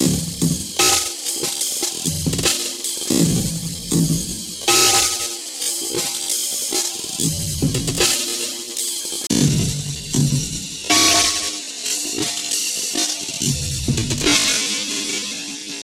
drunk drums.R
amen drum beat slowed to a pause
hop, drums, funky